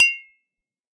glass cling 06
clinging empty glasses to each other